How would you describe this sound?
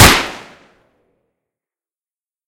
Single Pistol Gunshot 4.2
Gun, Gunshot, Pistol